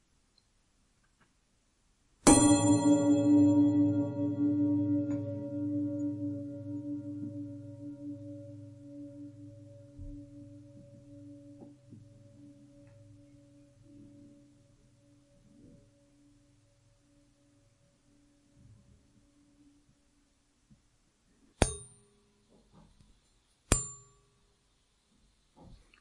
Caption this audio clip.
griddle - baking plate - backblech
just a nice sound i found in the kitchen :3
back, sheet, metal, one, hit, backen, nyan, blech, baking, impact, plate, tin, mettalic, shot, tray, griddle, iron